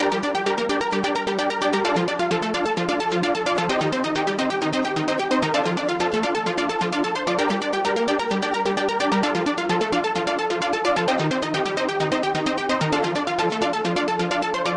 If We Only Knew 01
150-bpm, beat, drum, kickdrum, melody, pad, phase, sequence, synth, techno, trance